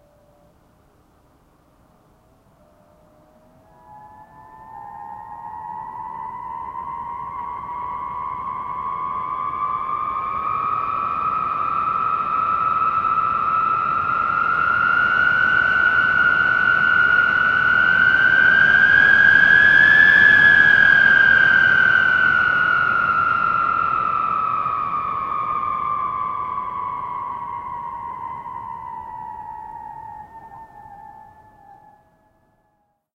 Recorded some really strong wind making freaky howling sound in my doorway
weather storm wind nature old-house deserted
deserted, howl, nature, storm, weather, wind